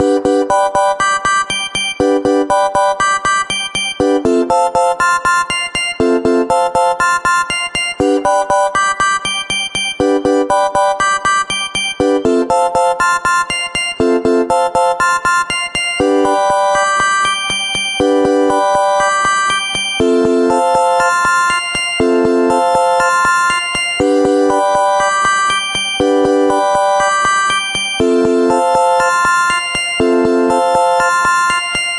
MA SFX RoboticMelody 1
Sound from pack: "Mobile Arcade"
100% FREE!
200 HQ SFX, and loops.
Best used for match3, platformer, runners.